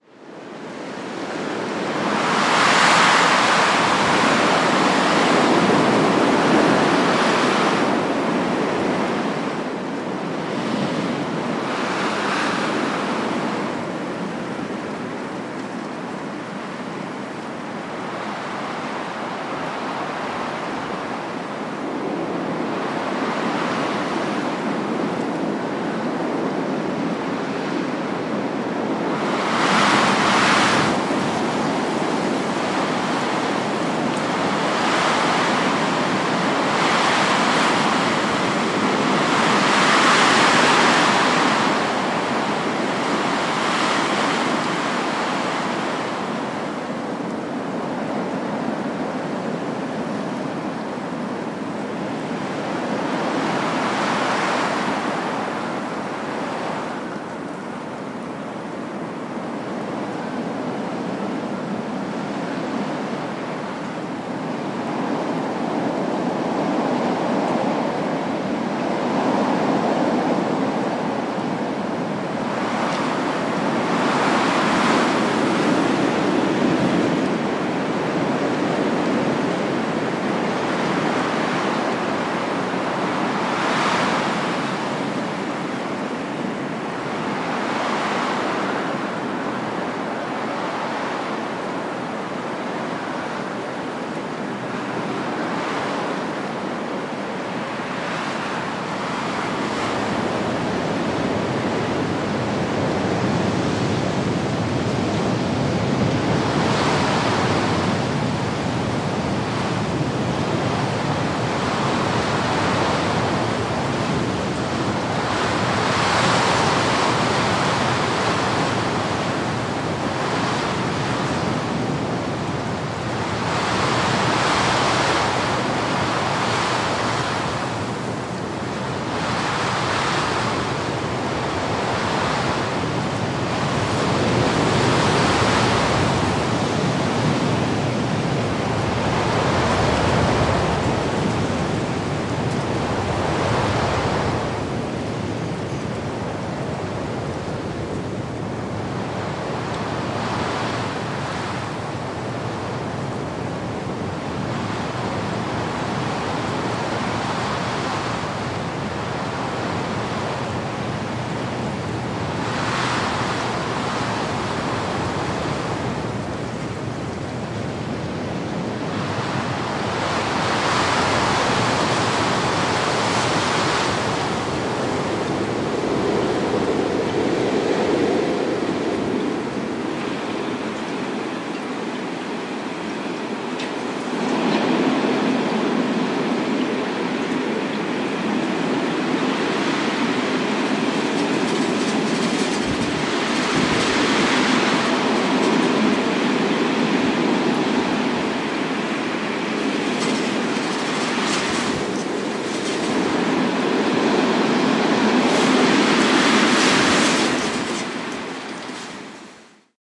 Wind, winter, strong, trees, cold, 08
Cold winter wind blowing, gust, conifer trees (2008). Zoom H2 internal mics.
cold, gusts, tree, wind, winter